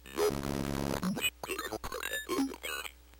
Solve This 8
Ah Gee..... These are random samples I recorder and am such a lazy I don't want to sort them out....
1 - Could be my Modified Boss DS-1 Distortion Pedal (I call it the Violent DS - 1) (w/ 3 extra Capacitors and a transistor or two) Going throught it is a Boss DR 550
2 - A yamaha Portasound PSS - 270 which I cut The FM Synth Traces too Via Switch (that was a pain in my ass also!)
3 - A very Scary leap frog kids toy named professor quigly.
4 - A speak and math.......
5 - Sum yamaha thingy I don't know I just call it my Raver Machine...... It looks kinda like a cool t.v.
ambeint, circuit-bent, circuits, electro, glitch, noise, slightly-messed-with, static-crush